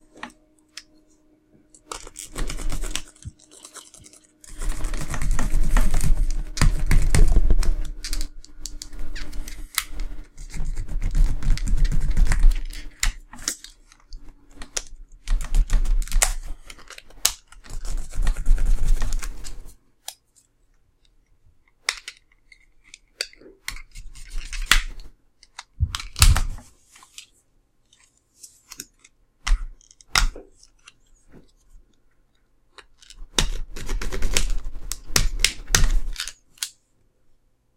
Me cutting through a plastic ID card with a piece of the same card. Sounds pretty cool.
grind, plastic, serrated